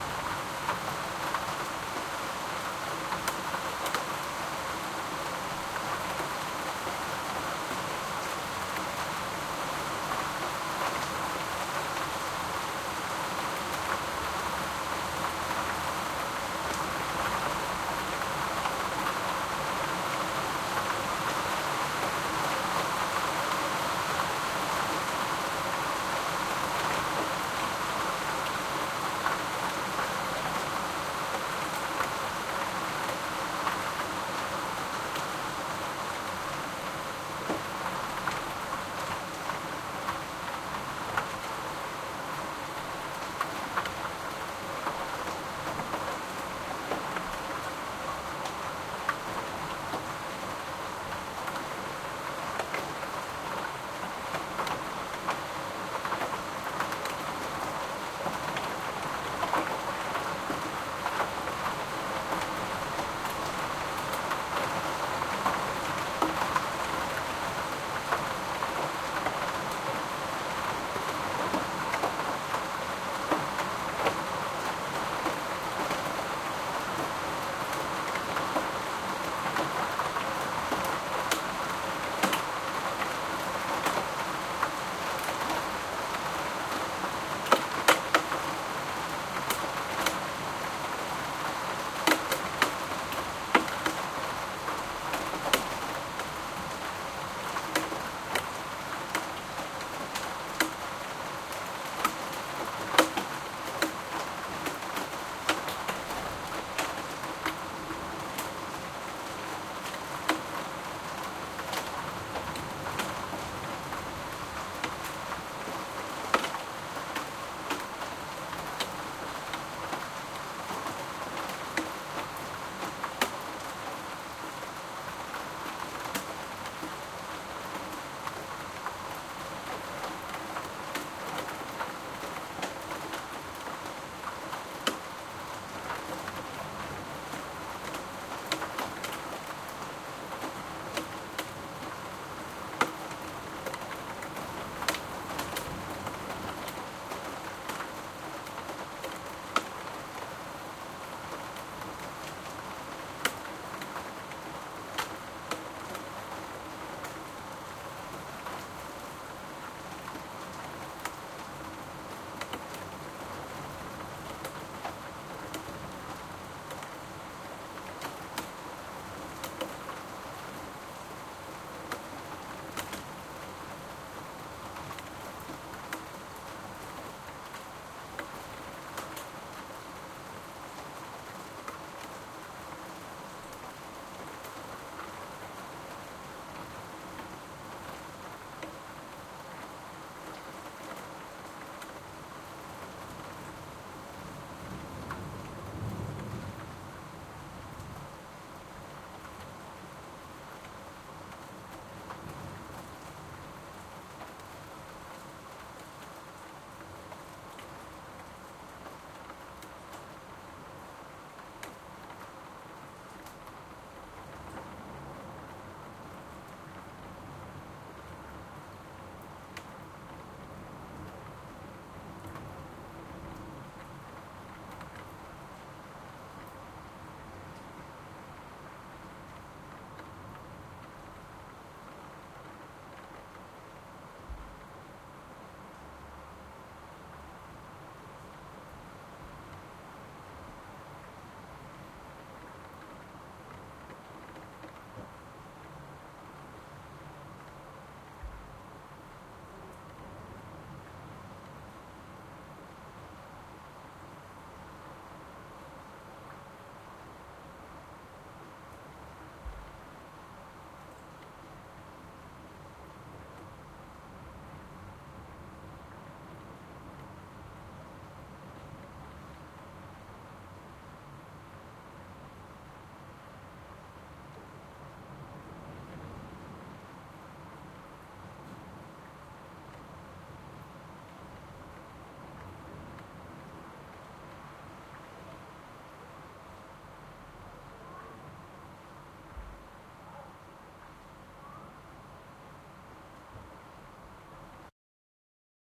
heavy rain decreasing
a bit of rain combined with typical city sounds like cars and tram. recorded from my apartment in the 4th floor.
rain, city, street